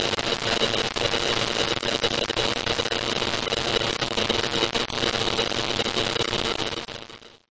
An old door knob being turned and recorded with a zoom H6